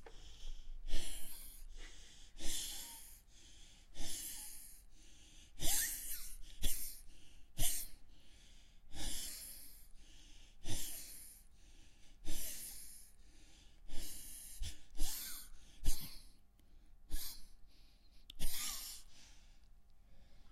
asthmatic breathing
Old and sick asthmatic man breathing heavily.
breath, man, heavy, old, asthma